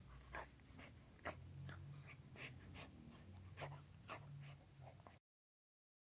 perro comiendo cuido envenenado
comer, cuido